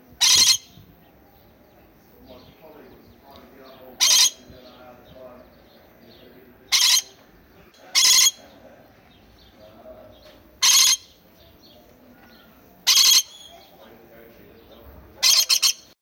Coconut lorikeet (warning call)
A warning call of a coconut lorikeet
aviary, bird, call, coconut, cry, lorikeet, parakeet, parrot, screech, threat, warning